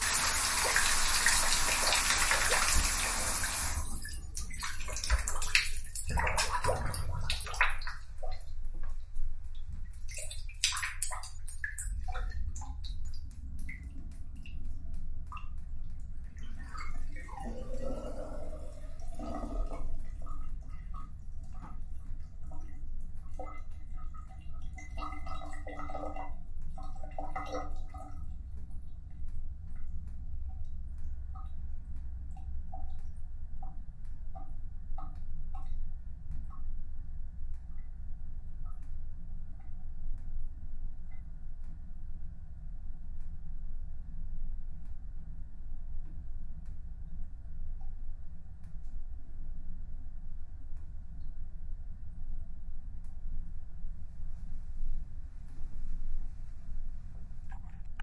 Shower Drain 4
Field recording of water going down my shower drain.